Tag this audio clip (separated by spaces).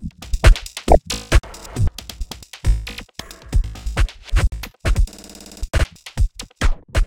synthesizer chords digital samples sample melody video hit game loops drums awesome music sounds